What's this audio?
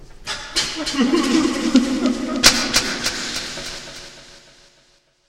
WALLAEYS Jeremy 2015 2016 TheAsylum
Welcome to the Asylum !
This creepy sound is the recording sound of laughts that I cut to work with other sound to immerse us in a creepy atmosphere of mental asylum. I transformed the laughs in tears by reducing speed, and a delay to add an effect of inaccuracy.
Typologie (cf. P. Schaeffer):
V = continu varié
C’est un groupe de son nodal
La timbre harmonique de ce son est métallique,
La microstructure du son peut être associé à un son étrange , déstabilisant .
Le grain du son est à la fois lisse et rugueux. Le son comporte de vibrato notamment dans la voix.
L’attaque du son est assez douce pour ensuite laisse place à des sons plus fort. C’est une dynamique graduelle.
Le son possède des variations en forme d’escalier.
Profil de Masse : Site
voice, sinister, Asylym, horror, creepy, tears, sad, evil, nightmare, loud